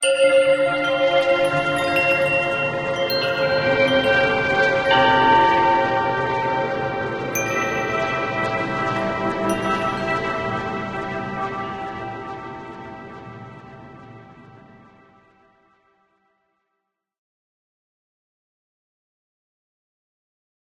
Secret Beauty 3
Tweaked percussion and cymbal sounds combined with synths and effects.
Bells, Deep, Atmo, Sound-Effect, Tingle, Melodic, Wide, Atmospheric, Percussion